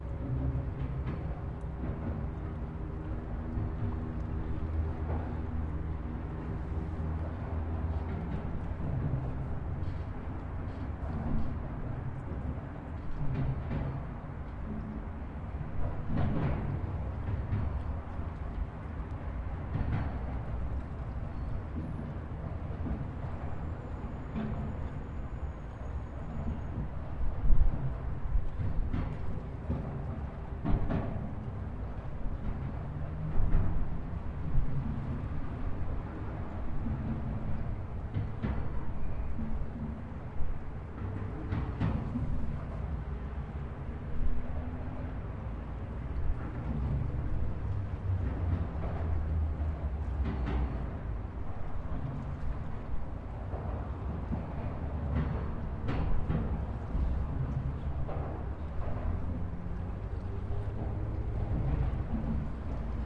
The roar of a bridge, when the cars drive over the bridge. Rumble under Leningradsky bridge near water and bridge substructure. Right river-side.
Recorded 2012-10-13.